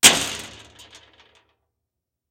throwing pebbles onto metal01
Contact mic on a large metal storage box. Dropping handfuls of pebbles onto the box.
percussion, metal, tapping, metallic, gravel, rocks, impact, pebbles, clacking, stone, contact-mic, clack, stones, piezo, pebble, percussive, tap, rubble